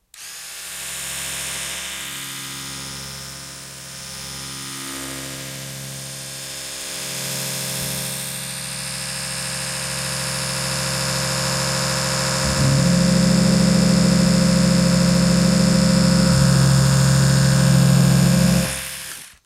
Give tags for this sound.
latch
mechanical

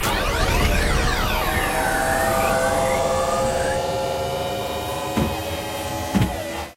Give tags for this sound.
Sc-Fi
Up

